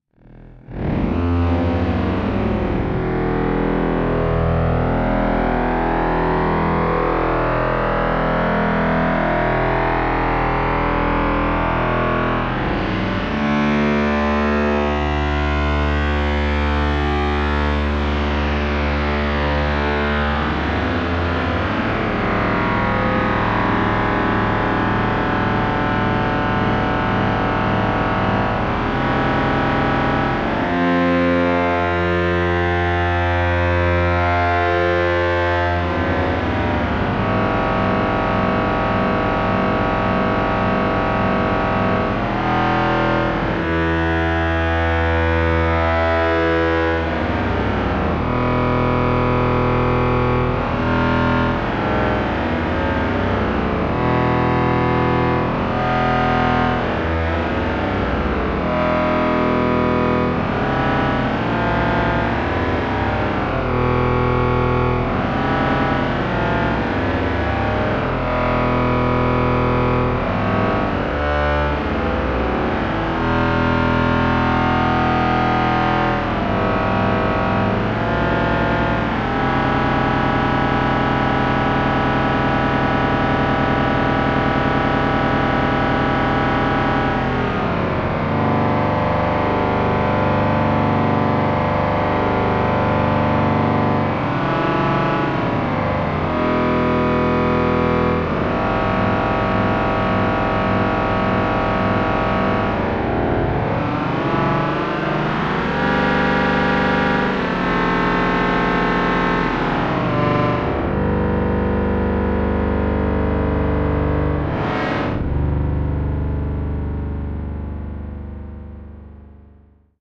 A sound generated in the software synthesizer Aalto, recorded live to disk in Logic and edited in BIAS Peak.
abstract, metallic, ominous, resonant, soundscape, synthesized